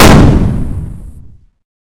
Heavy weapon 002 - Single shot
Some sort of heavy weapon sound. Similar to the n. 001 but with lower tone. Created with audacity from scratch.
weapon, shooting, gun, firing, fire